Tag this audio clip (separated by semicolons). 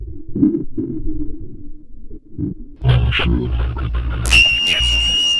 UFO; Effect; Space; Sci-FI; Alien; Scary; Monster; Connection; Radio; Sound; Spacecraft